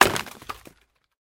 Crate Break 3
Breaking open a wooden crate.
break, crate, wood